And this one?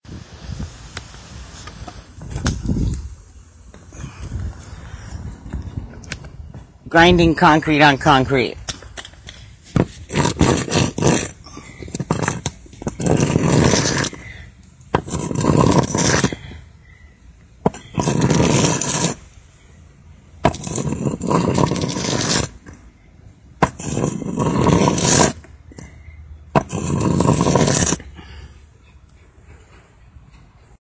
Using a Sennheiser mic, dragging a concrete block across my concrete driveway.